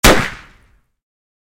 Gun Sound 1
gun, sound, sound-effect, action, horror, effect